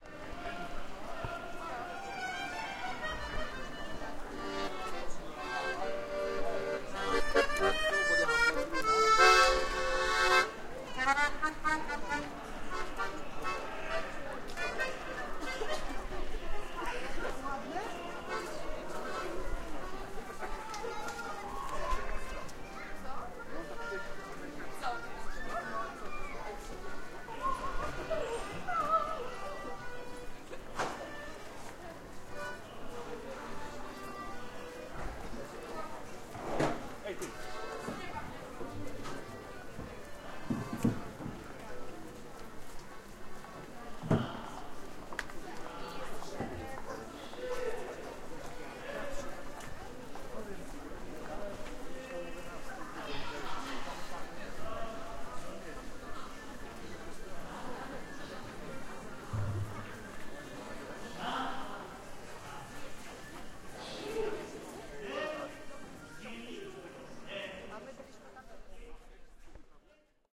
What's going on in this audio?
fair, stalls, accorfair, stalls, accordion, city, presentation, wine festival, a walk, market, mall, Poland
a, accordion, city, fair, festival, mall, market, presentation, stalls, walk, wine